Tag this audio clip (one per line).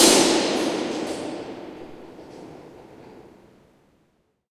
drum
field-recording
hit
industrial
metal
metallic
percussion
percussive
staub